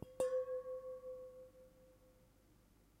Megabottle - 02 - Audio - Audio 02
Various hits of a stainless steel drinking bottle half filled with water, some clumsier than others.
ting, bottle, steel, ring, hit